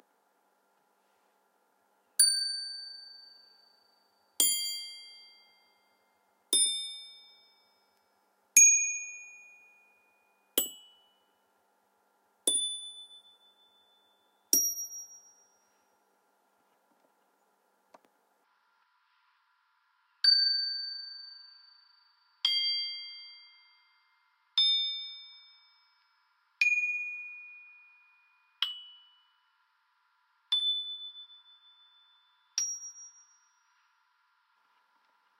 20090814 metallic hardware "bells"
An assortment of metal tools (socket wrenches, crescent wrenches, bolts) are played with a mallet to create a sequence of ringing bell-like tones. These tones ascend from low to high frequency.
Two different levels of gain are combined onto a single recording, originally on cassette tape.
The fundemental frequencies for each object are estimated to be:
1593 Hz, 2110 Hz, 2282 Hz, 2454 Hz, 2928 Hz, 3488 Hz (X2 objects), 5641 Hz